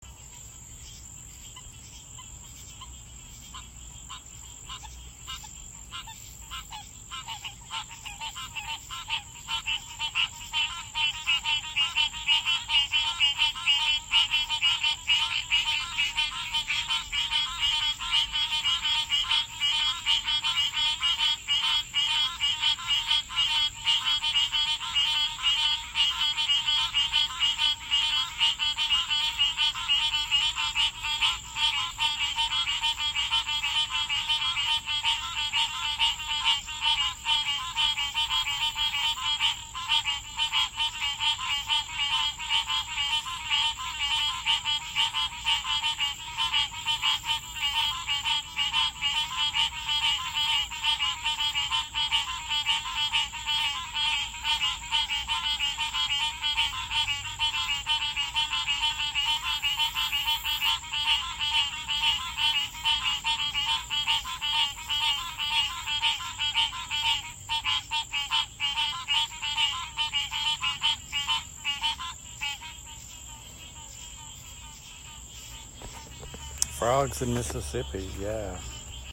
Loud Rhythmic Frogs
Loud, insane frogs in Pass Christian, Mississippi. They chirp in a rhythm that sounds almost like techno music. Loud, repetitive sounds that make you feel like you're going crazy. Recorded at very close range with my iPhone 8.